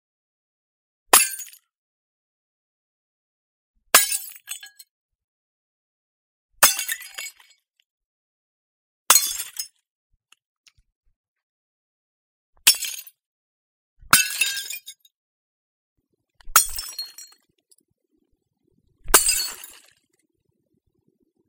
Smashing up beer bottles
What the heck is a bar fight without smashing up some real beer bottles? Not much I guess so I recoreded these. Yes, they are REAL beer BOTTLES.